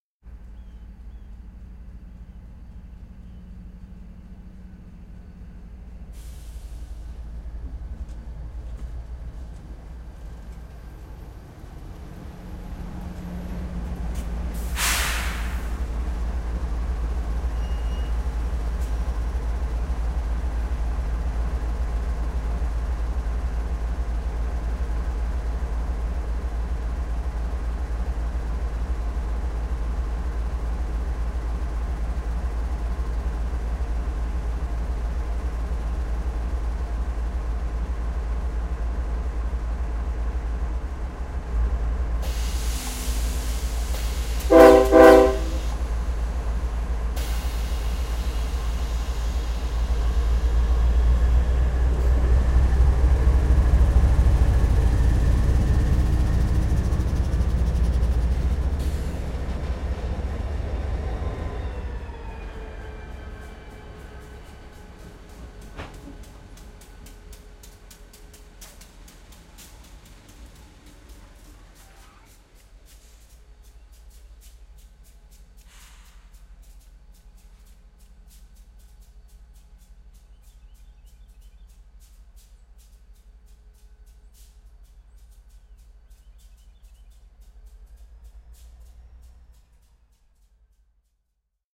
Locomotive 3 Approach Idle Exit
Diesel Locomotive approaches slowly, stops in front of mic, idles for a
time then slowly leaves. Some cool diesel, electric and air sounds in
this one. This sample pack is numbered chronologically as edited from
the original recording: Engine approaches from left with recording #1
and exits to the right with recording #5. Recordings are of a Diesel
locomotive approaching and mating with the rear of a freight train
outside of a wherehouse in Austin, Tx. Rode NT4 mic into Sound Devices MixPre, recorded at 16bit 44.1 with Sony Hi-Md. Edited In Cubase.
engine, field-recording, horn, locomotive, machine, sound-effect, train